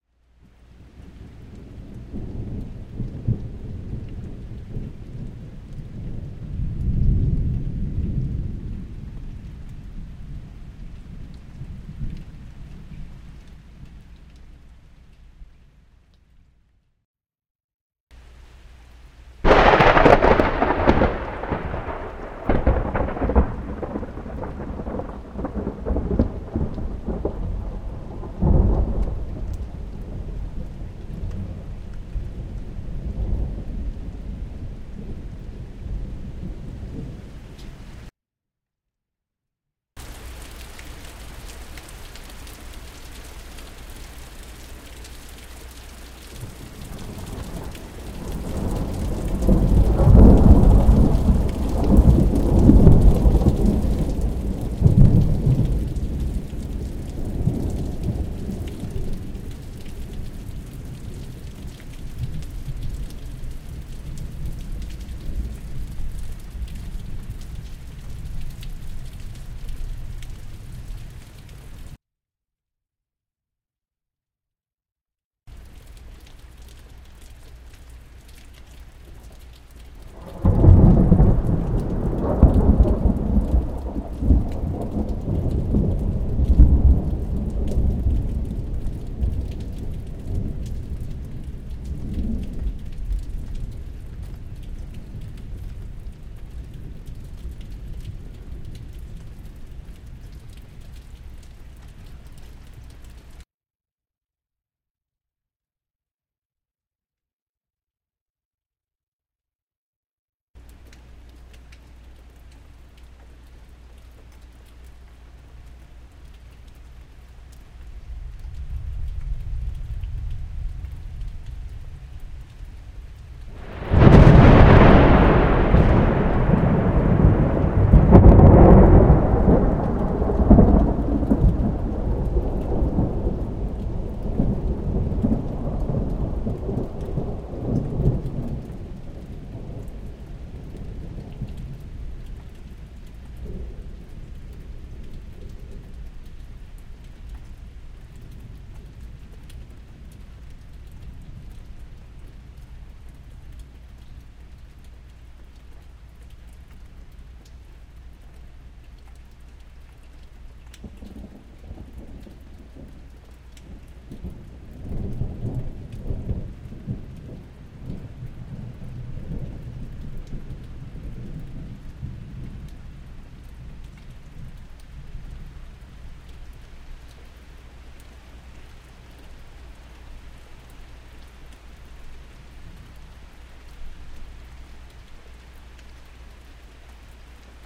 Four Thunder Claps from a line of storms that passed through Owensboro in Western KY Dec 10 2021. Sorry but I did not edit the clipping - which is not really that bad.